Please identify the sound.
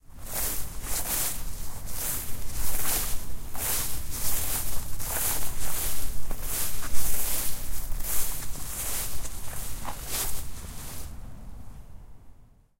paper bag ruffle
ruffle, Paper-bag, crinkle, paper